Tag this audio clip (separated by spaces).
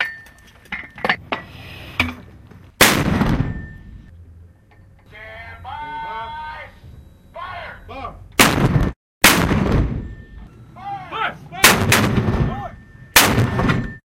arming Artillery attack bam bang battle bombardment boom chime clang command ding english explosion fire gun Heavy loading metal mortar projectile shooting shot System voice